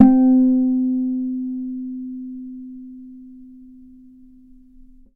Small guitar I picked up at a consignment store up the coast a ways... I tried putting regular guitar strings on it but they seem too strong for the tuners. This is one of the original strings (D) that were left on it. Recorded with Behringer B1 through UB802 to Reaper and edited in Wavosaur.